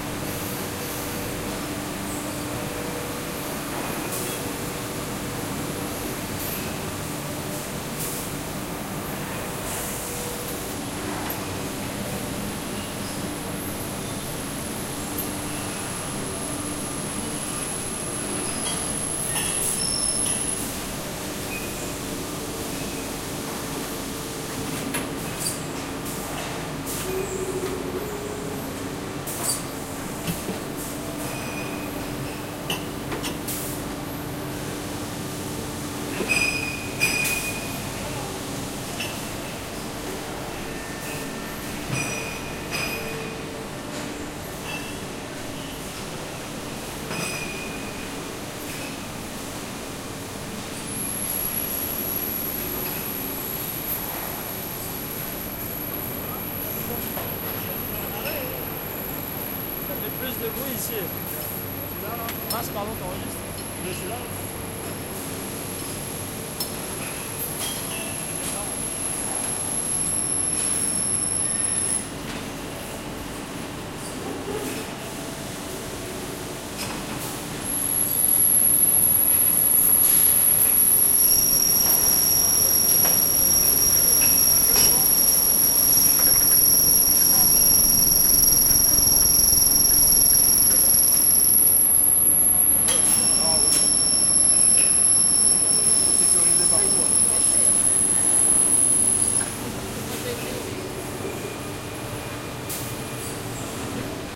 Usine-12-ambiance-machines
Sounds recorded a few years ago in Le Mans. Semi automated line for car parts production.
plant, factory